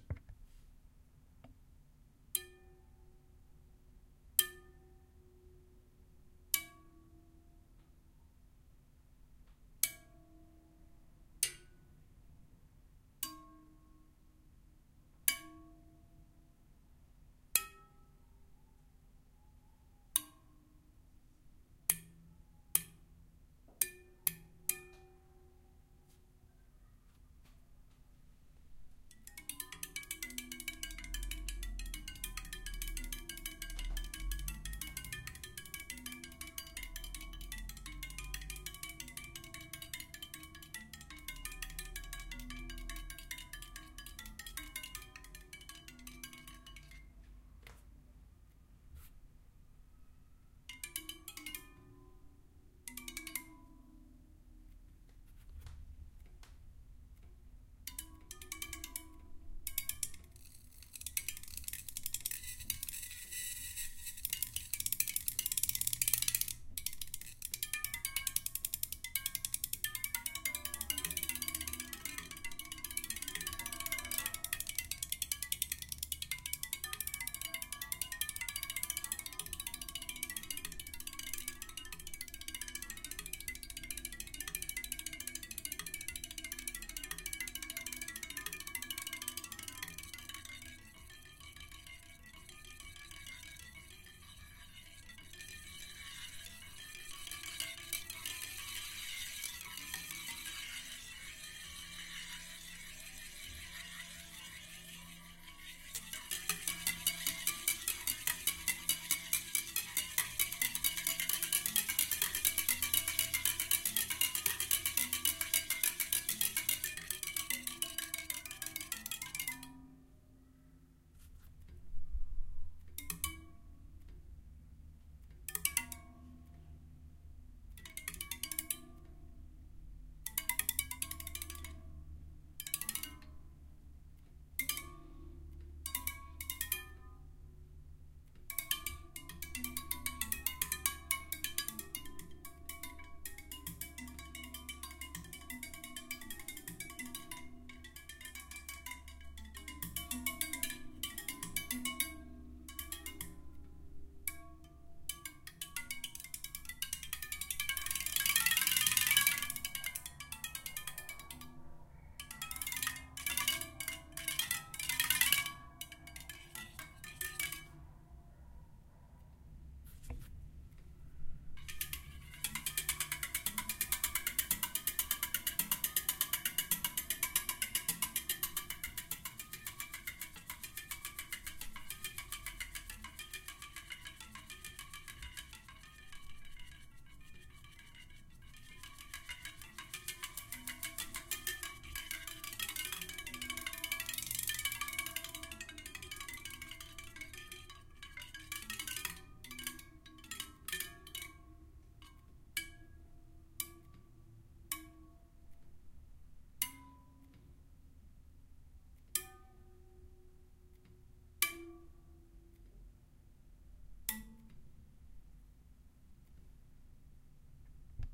Recording different sounds of my bicycle. Made with a zoom h4 recorder
bicycle, cycles, metal, repetitive, rotating, studio, wheels